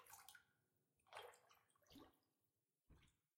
Water splashing with a lot of echo